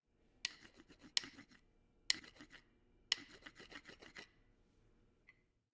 Rubbing Drum Sticks Manipulation
Rubbing and tapping drum sticks together. This is the manipulated file.
Drum, Sample, Sticks